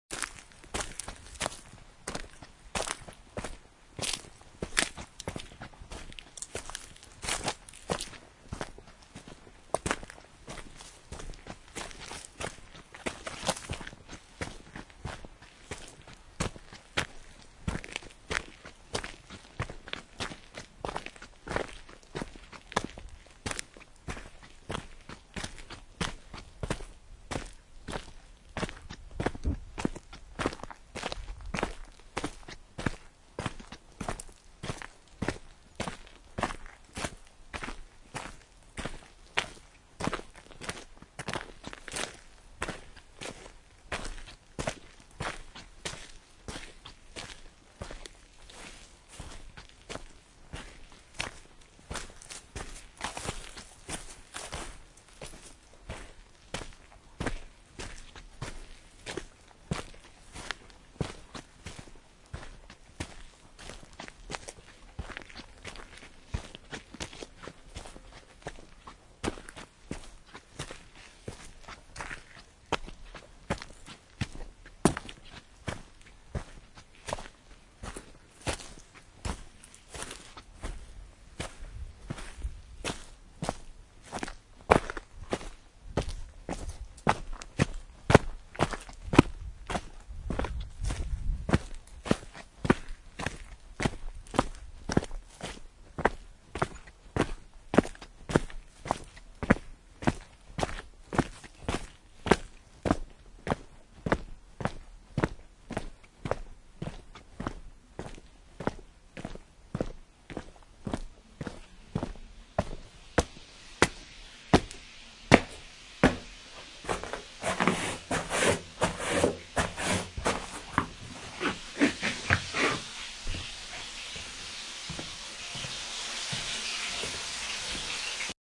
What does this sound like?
Walking all the way home into the house, into the kitchen with chips frying in a pan. To get a good close-by sound I let the microphone hang down from it's chord at shin level. The ground is varying from mud to gravel, to stone and concrete. The path is going up and down so the tempo of the steps is varying too.